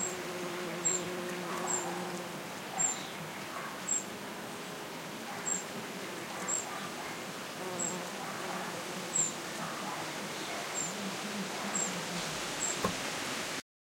bee buzzing
insect, buzzing, bee